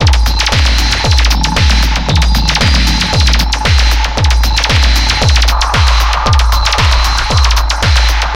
115 BPM STAB LOOP 28 mastered 16 bit
I have been creative with some samples I uploaded earlier. I took the 'STAB PACK 01' samples and loaded them into Battery 2 for some mangling. Afterwards I programmed some loops with these sounds within Cubase SX. I also added some more regular electronic drumsounds from the Micro Tonic VSTi.
Lot's of different plugins were used to change the sound in various
directions. Mastering was done in Wavelab using plugins from my TC
Powercore and Elemental Audio. All loops are 4 measures in 4/4 long and
have 115 bpm as tempo.
This is loop 28 of 33 with an urge to rave but only at 115 BPM.
weird, 115bpm, electronic, dance, loop, drumloop